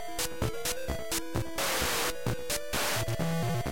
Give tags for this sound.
8
8-bit
beat
cbasicore64
drums
feel
static
synth

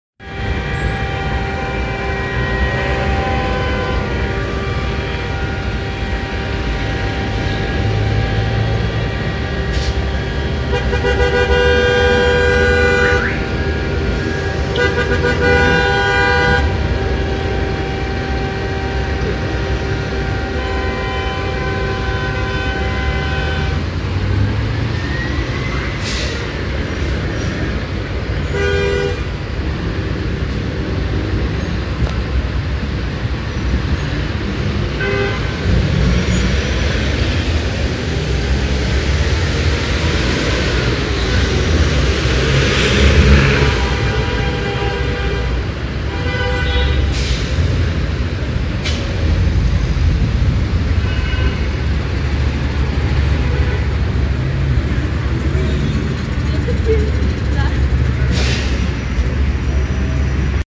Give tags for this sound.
horns
urban

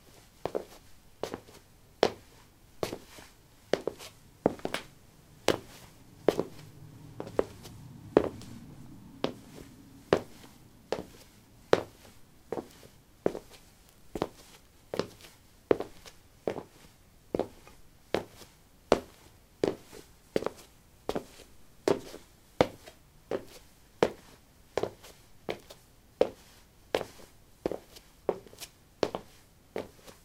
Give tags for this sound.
footstep
footsteps
step
steps
walk
walking

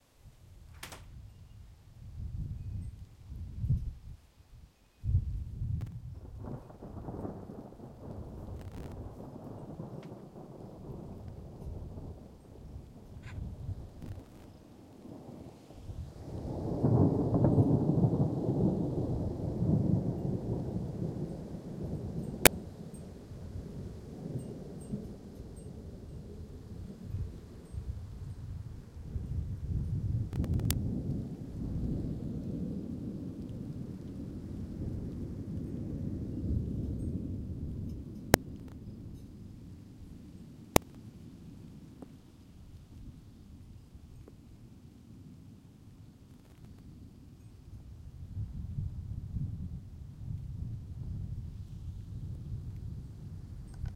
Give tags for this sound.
thunder
texas

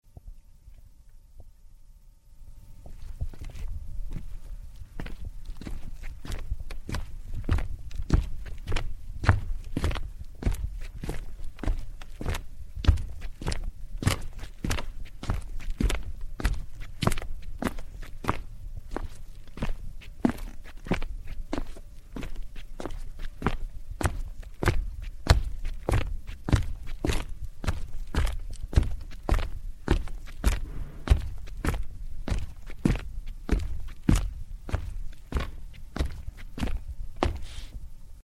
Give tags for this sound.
field-recording
footsteps
walking
wood

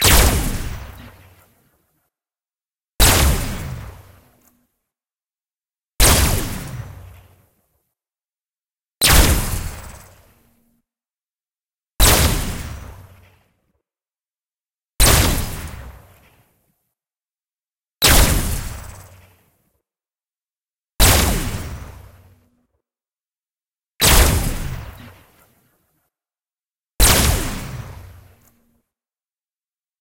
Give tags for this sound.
blaster
gun
sci-fi

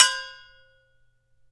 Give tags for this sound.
technique
mouthpiece
metalic
extended
davood
trumpet

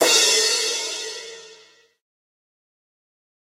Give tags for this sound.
guitar
drums
free
filter
sounds
loops

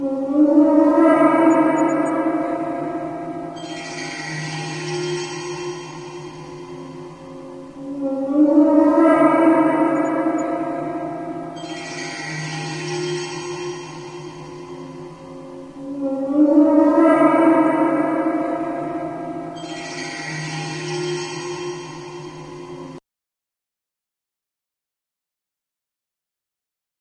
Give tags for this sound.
beat
ambient
mix

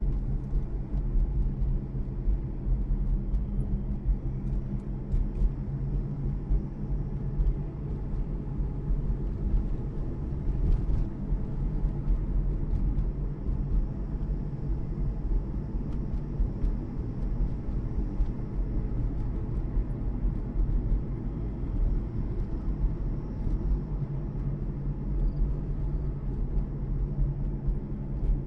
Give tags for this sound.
interior
open-car-windowsbumpy-road
evening
summer
cricket
car
canada

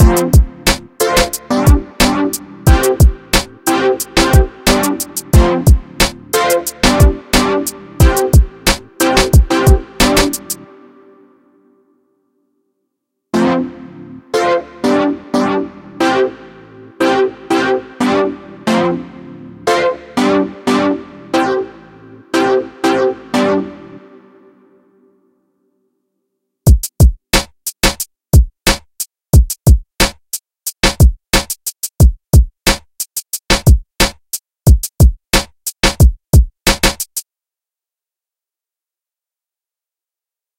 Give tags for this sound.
dancing
background
sound
loop
chord
drop
club
part
move
rap
disco
dance
pattern
pbm
broadcast
jingle
beat
interlude
music
freebeat
mix
intro
radio
hip-hop
podcast
stereo
instrumental

